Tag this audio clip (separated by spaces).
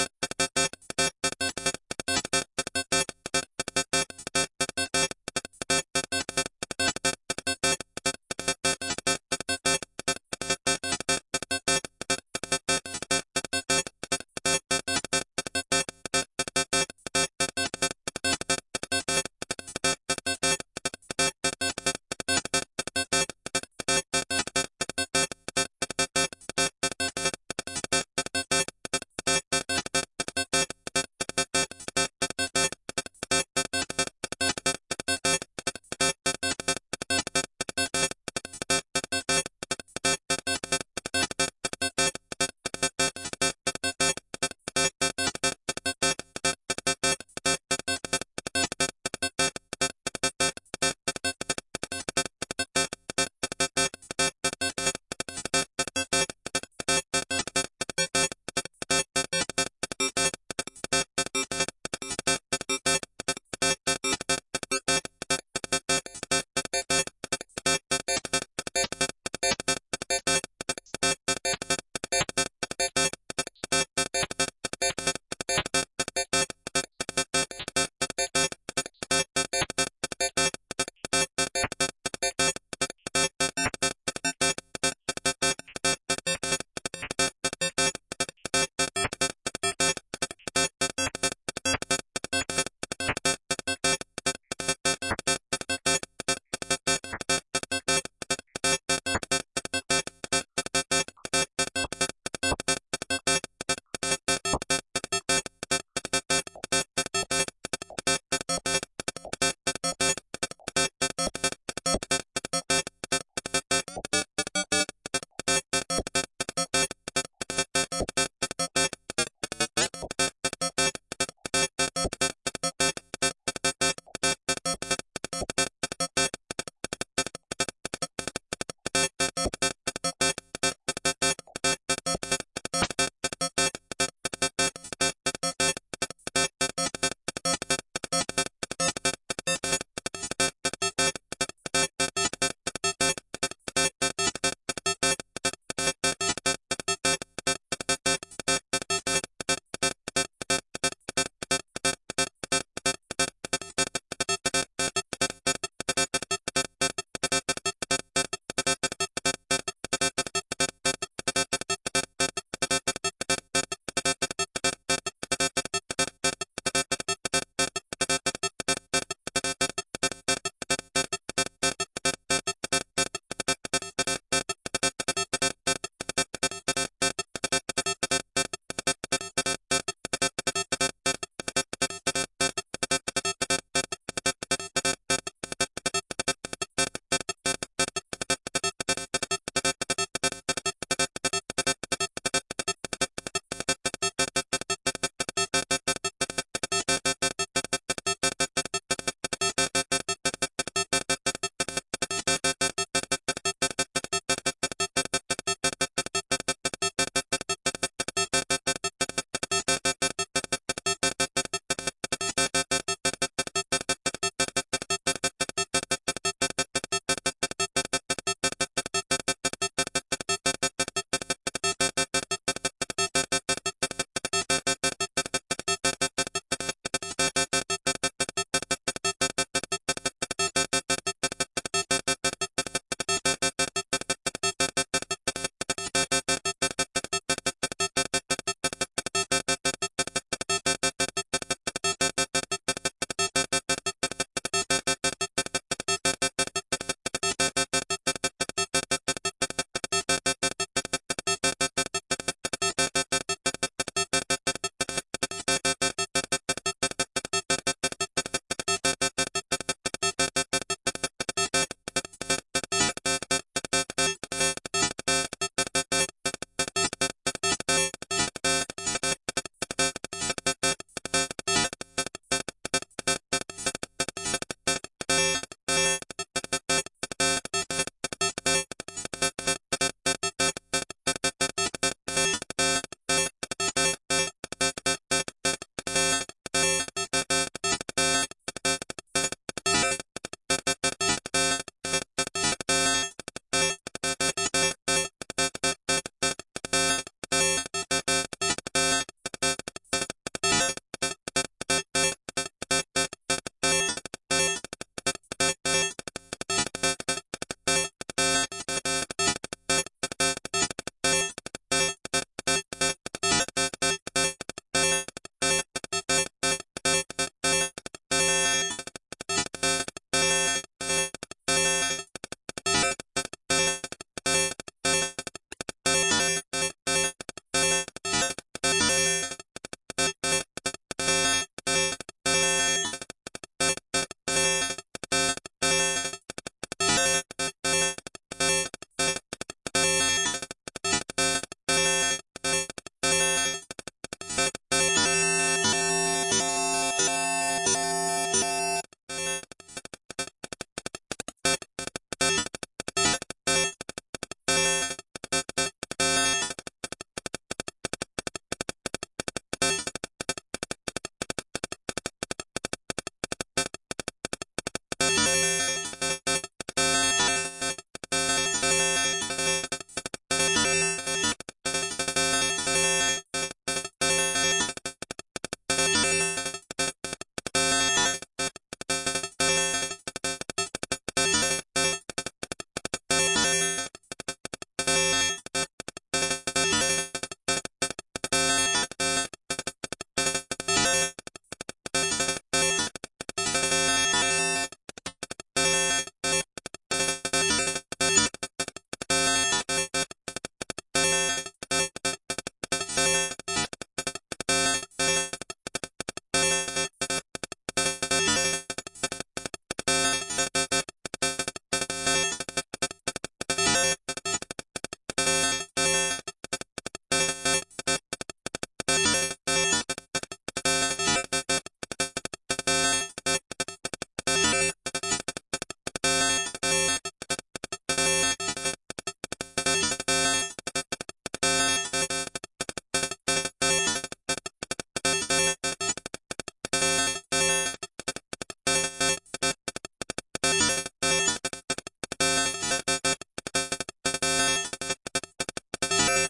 clang digital modular percussion synth synthesizer